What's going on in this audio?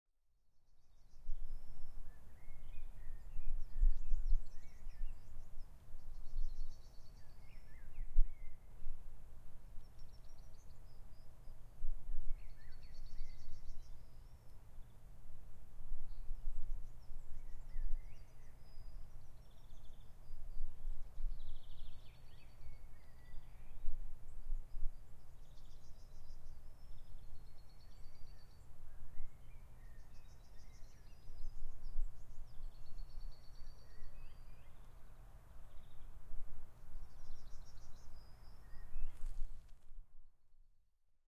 birds on a tree